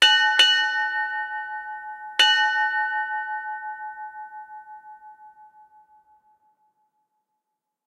Three Bells,Ship Time
As early as the 15th Century a bell was used to sound the time on board a ship. The bell was rung every half hour of the 4 hour watch.Even numbers were in pairs, odd numbers in pairs and singles.
3-bells, maritime, ships-bell, seafaring, naval, ship, ding, nautical, sailing, bell, time